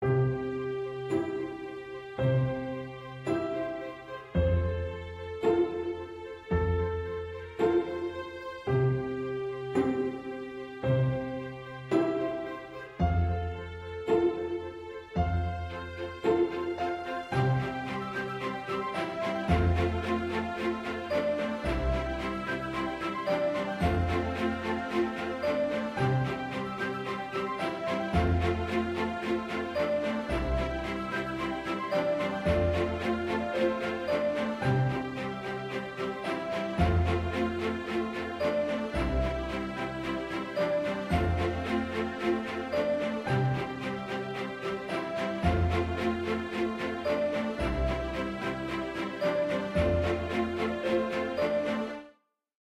Happy Background Music Orchestra (Loop)
Genre: Happy, Orchestra
Just another orchestra experiment
background, happy, loop, music, orchestra, string, woodwinds